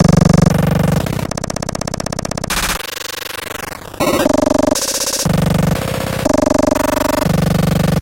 Bend a drumsample of mine!
This is one of my glitch sounds! please tell me what you'll use it for :D
android, art, artificial, bit, command, computer, console, cyborg, databending, droid, drum, error, experiment, failure, game, Glitch, machine, rgb, robot, robotic, space, spaceship, system, virus